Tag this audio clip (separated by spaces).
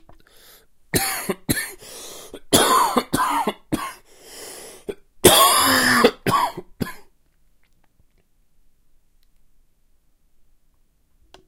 disease coughing sick health unhealthy cough virus sneeze cold sickness ill infection